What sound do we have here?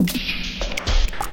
deconstruction-set thefinalcrash01
This is part of a sound set i've done in 2002 during a session testing Deconstructor from Tobybear, the basic version
was a simple drum-loop, sliced and processed with pitchshifting, panning, tremolo, delay, reverb, vocoder.. and all those cool onboard fx
Tweaking here and there the original sound was completely mangled..
i saved the work in 2 folders: 'deconstruction-set' contain the longer slices (meant to be used with a sampler), 'deconstruction-kit' collects the smallest slices (to be used in a drum machine)
noise, robot, fx, crash, switch, hi-tech, click, transform, slices, glitch, digital, cuts, electro, effect, alien, cyborg, computer, soundeffect, button, processing, clack, mutate, soundesign